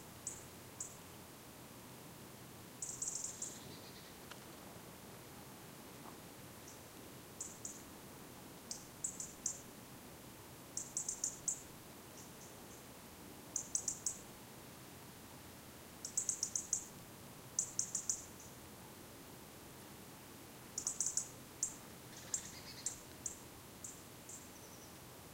Robins often come near you during winter, so I could record this one reasonably well. Other birds (and distant shots) in background
birds, field-recording, nature, robin, south-spain, winter